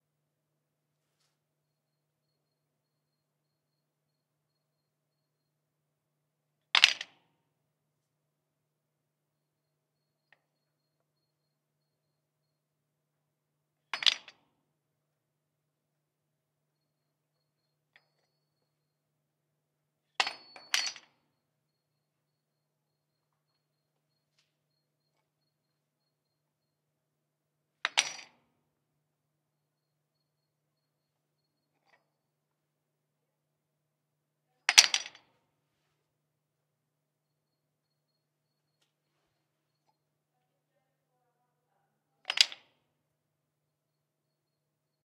The sound of a hammer hitting the ground. Recorded outdoors.